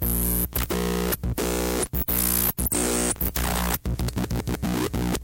A basic glitch rhythm/melody from a circuit bent tape recorder.